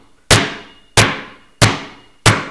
eduardo balon 2.5Seg 6
ball, Bouncing, bounce